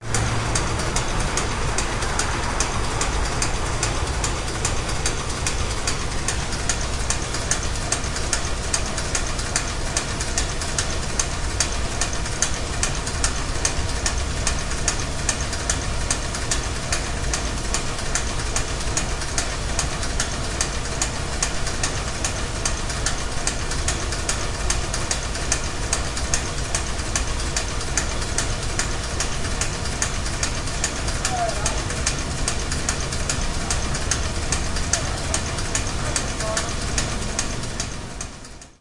Light machinery running, clicking, clacking.
Recorded using the Microtrack with the "T" mic on a pole. This was from the window of a car parked outside the eastern-most window of the factory. The sound from this machine was very localised- if I moved a foot to the left or right the volume dropped off dramatically. At this center spot you can hear very well the character of the machinery- it sounds like well-oiled, precision steel parts moving. The effect of localization may be caused by plastic or sheet-metal safety shields which are placed around some machines. They would block sound effectively. There are some voices toward the end.